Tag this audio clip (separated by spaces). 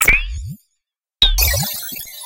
fx funny soundeffect oldschool computing bleep data cartoon computer soundesign digital sci-fi lab movie analog spaceship scoring effect future retro space off commnication energy soundtrack signal info laser loading film